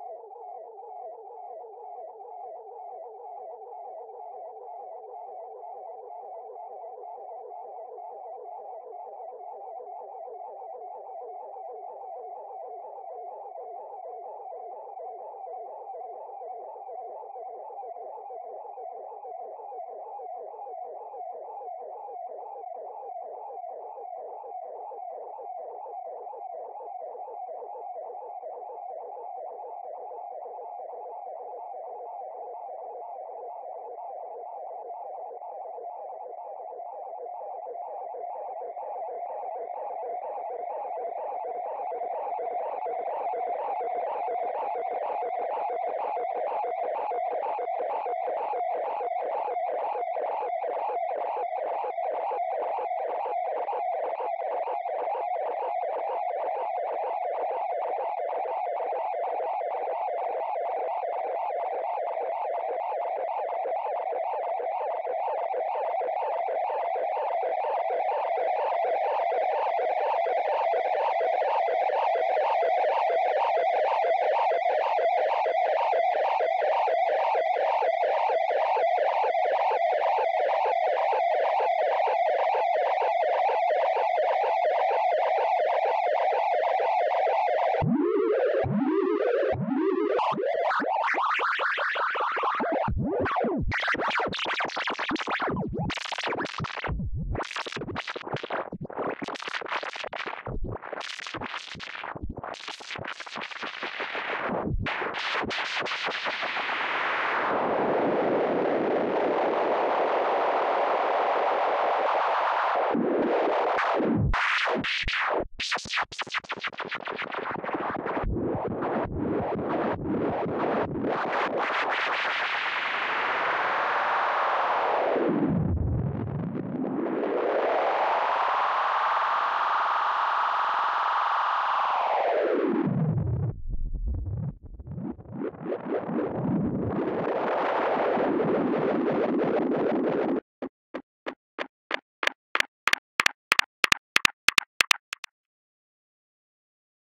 Evolving delay feedback loop
Pure nonsense. Lovely textures to be abused creatively. Made with SoundToys' Echoboy Jr.